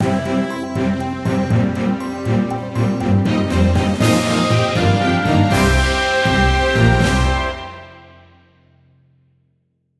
News End Signature
This is the short end signature of my News-jingle concept.Real stuff!
intro, jingle, loop, news, radio